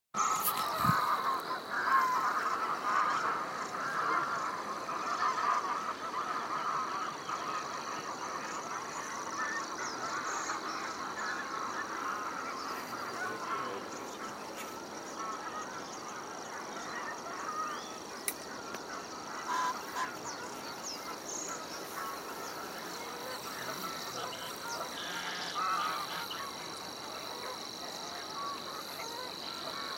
7-Ackerdijkse Plassen-3
birdsong at sunrise, at the protected area in Ackerdijk, north of Rotterdam.
birds, birdsong, field-recording, nature, spring